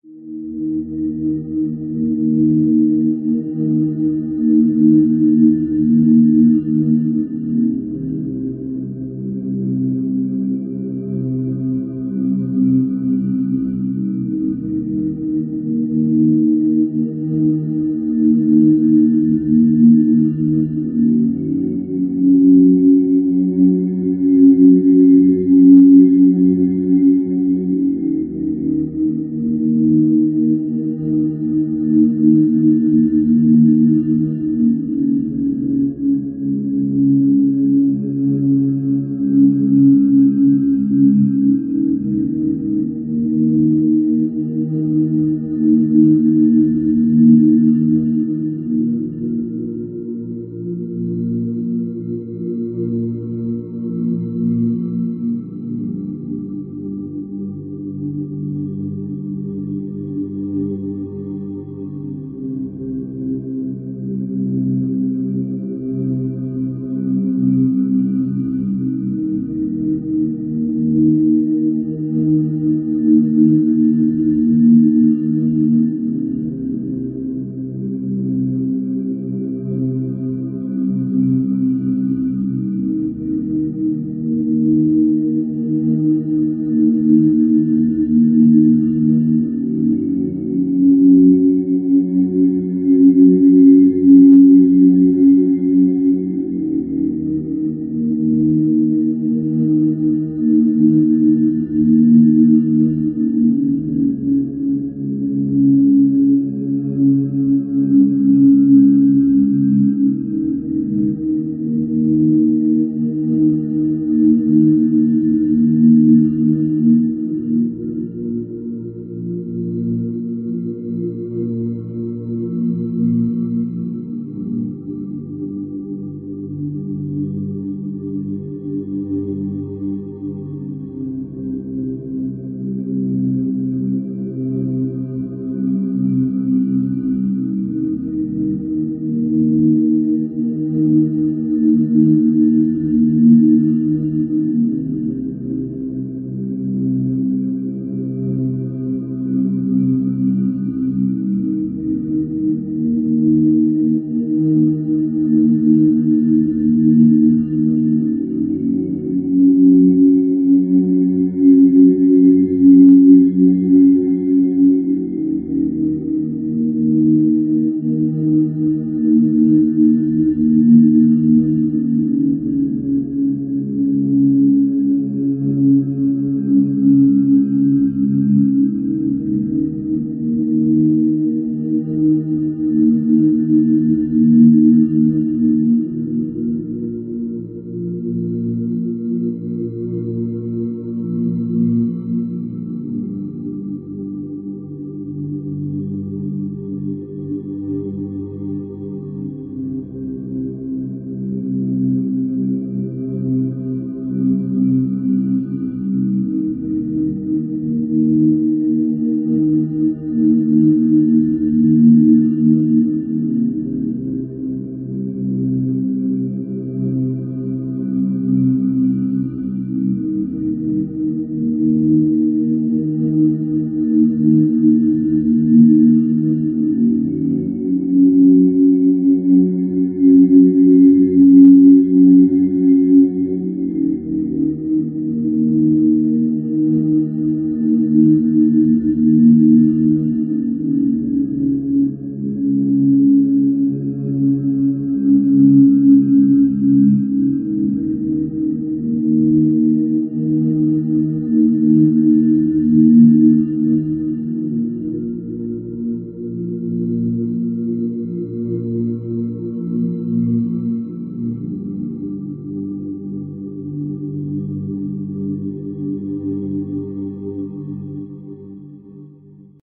CWD LT ambient 3 cemetery
ambience, ambient, atmosphere, cosmos, dark, deep, drone, epic, fx, melancholic, pad, science-fiction, sci-fi, sfx, soundscape, space